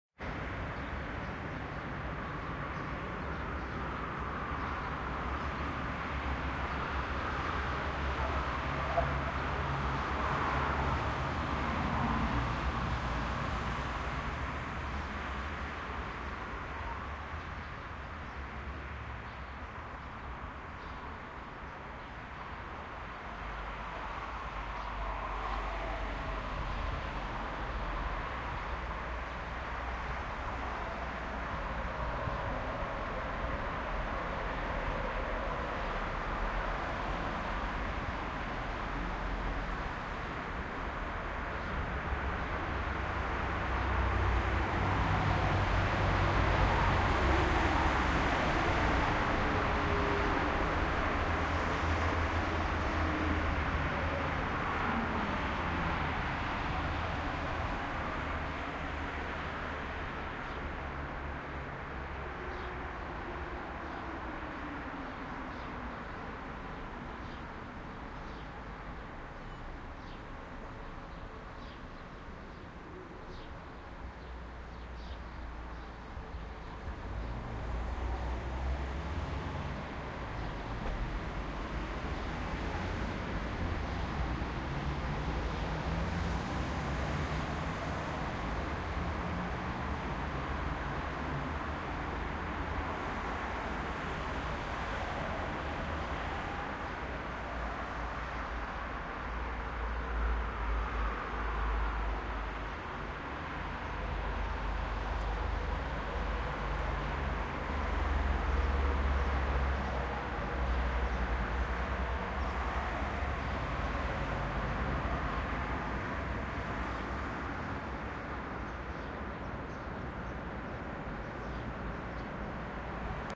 Cars passing left and right on the boulevard in front of my apartment.